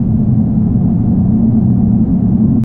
SPACE SHIP
I created sound in TAL-Ele7ro-II, DUNE (VSTi) and free sound air conditioning.
Tell me in comments, where used my sound (Please)